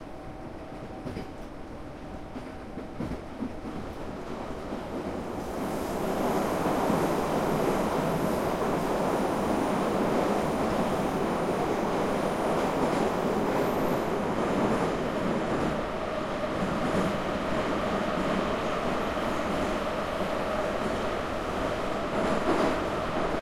Moscow metro wagon ambience.
Recorded via Tascam DR-100MkII.